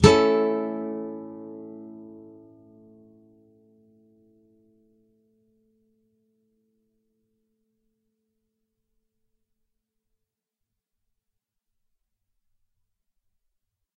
A Bar thin strs
Standard open A Major Bar chord but the only strings played are the E (1st), B (2nd), and G (3rd). Up strum. If any of these samples have any errors or faults, please tell me.
acoustic, bar-chords, guitar